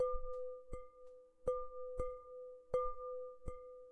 A simple, dark bowl my mother uses normaly for the salat played at 120bmp with the fingertips.